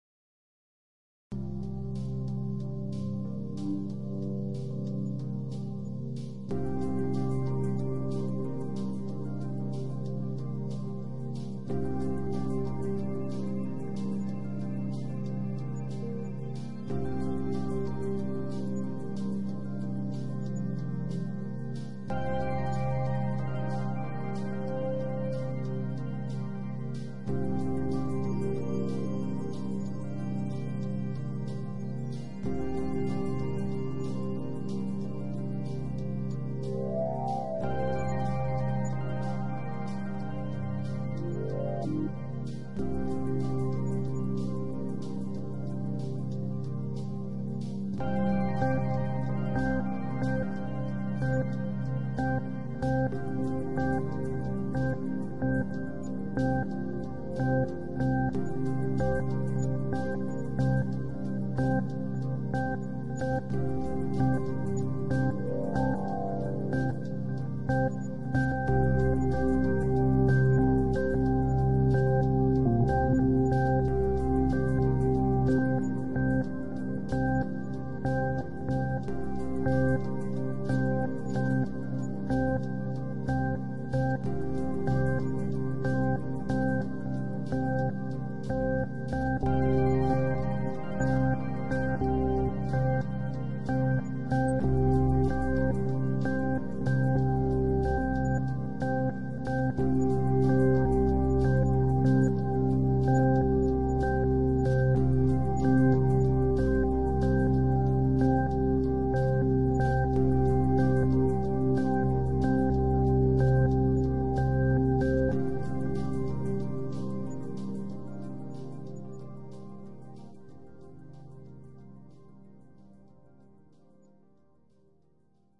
Experimental sequencer music
Ambient sequencer loop cosmic e music.